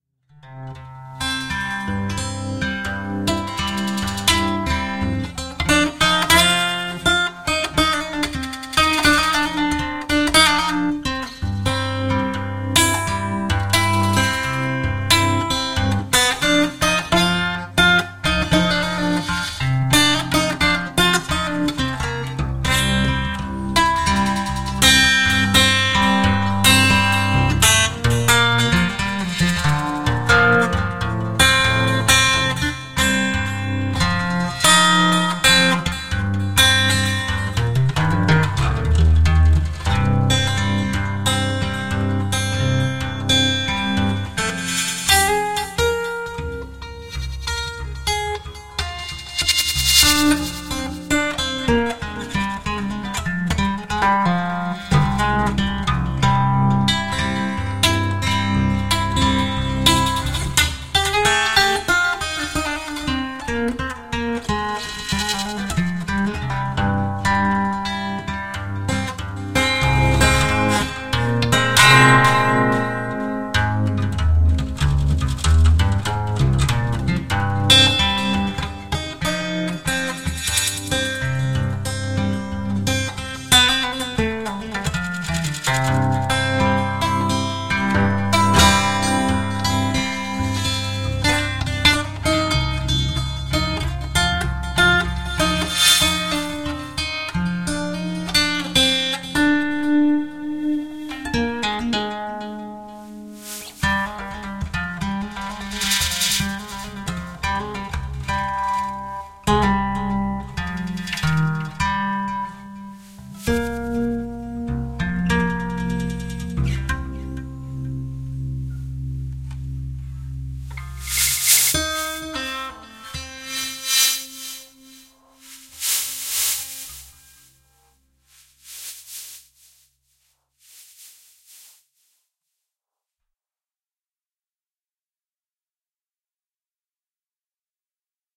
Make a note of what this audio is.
acoustic guitar with fab filter in ableton live
this is the same acoustic guitar but processed in ableton and with fab filter saturn